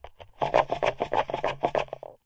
Sand Shaker
music, Sand